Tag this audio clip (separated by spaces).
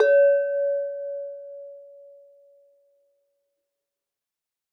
glass unusual wine crystal giant wineglass wine-glass clink bell